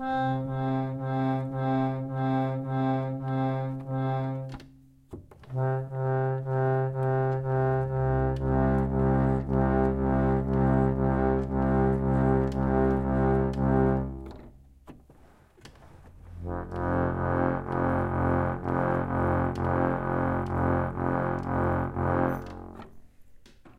Pump Organ - C2, C1, F0
Recorded using a Zoom H4n and a Yamaha pump organ, I played the C notes below middle C, and then the lowest note, an F.
c, low, notes, organ, pump, reed